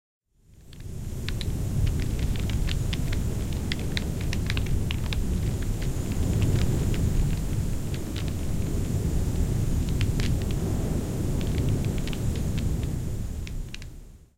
Bonfire Flames
A burning bonfire with up close flames.
fire, bonfire, flames, burning